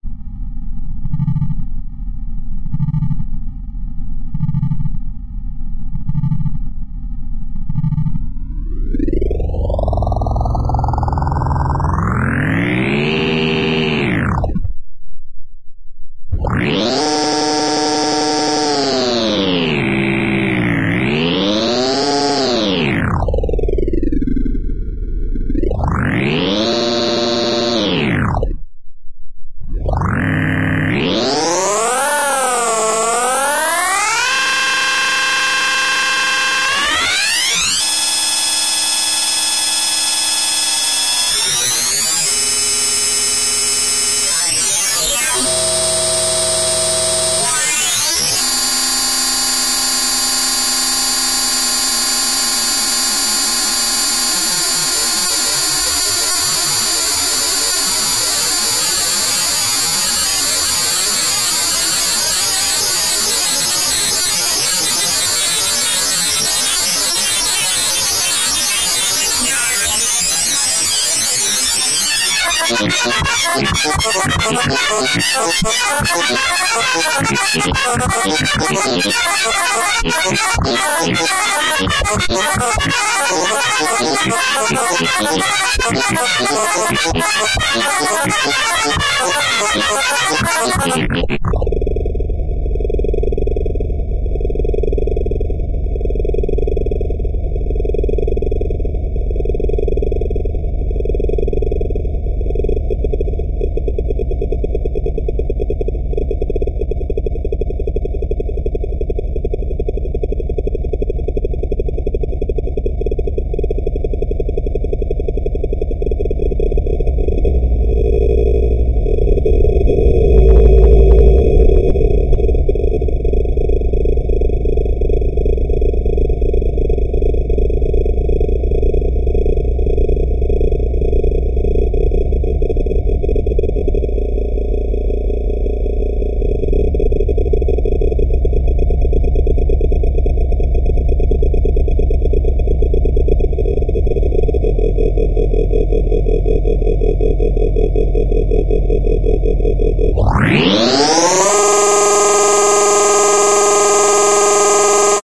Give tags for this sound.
granular; synthesis; weird

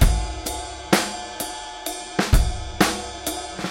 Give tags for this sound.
acoustic; loops

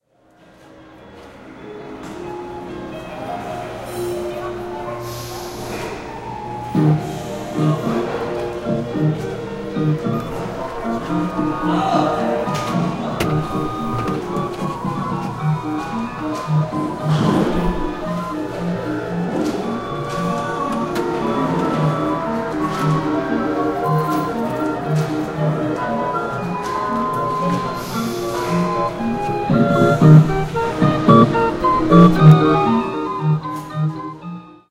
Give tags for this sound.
ambience; people; piano; toy; jukebox; music; ai09; noise; museum

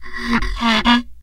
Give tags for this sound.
daxophone; friction; idiophone; instrument; wood

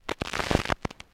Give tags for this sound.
analog; glitch; noise; record

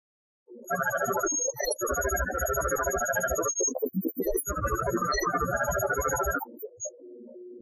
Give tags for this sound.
alien; electronic; emx-1; experimental; hardware; machine; sci-fi

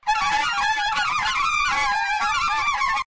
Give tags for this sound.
birds; clarinet; crazy; ducks; squeak; wailing

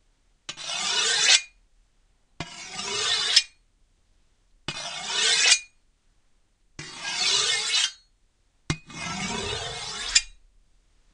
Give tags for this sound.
blade; felix-solingen; foley; knife; metal; scrape; sharpen; sharpening; steel; stereo; sword; wusthof-trident

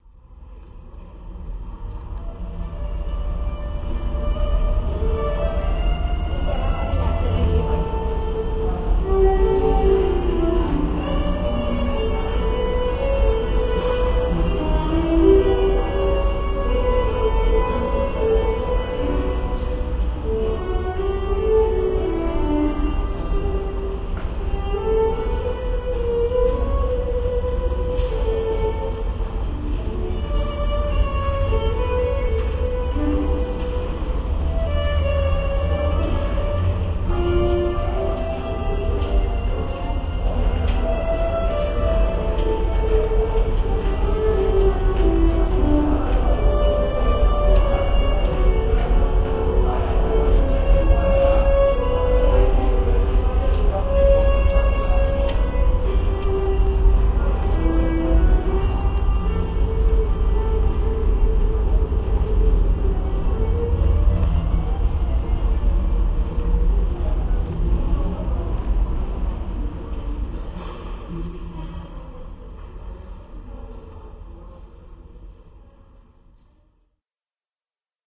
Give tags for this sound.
street; movie-sounds; field-recording; street-singer; ambient

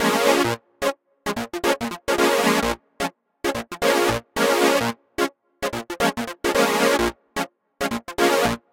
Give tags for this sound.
funk; loop; synth

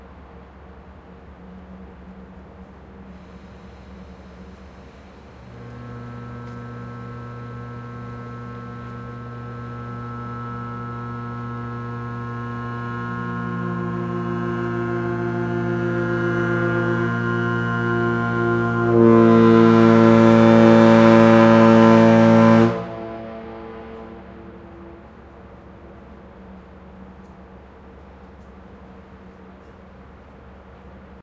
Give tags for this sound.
field-recording; ferry; foghorn

